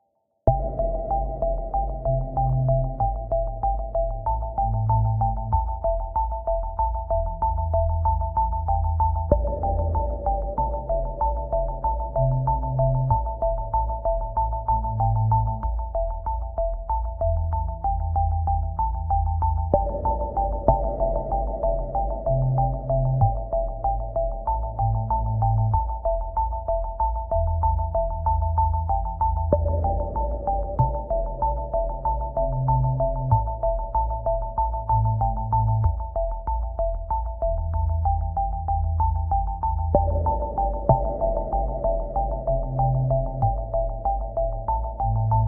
electronic music loop 001
electronic music loop
ableton and massive sounds
ableton, ambient, atmosphere, electro, electronic, live, loop, music, rhythmic, synth